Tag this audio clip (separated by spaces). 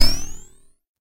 electronic industrial percussion short stab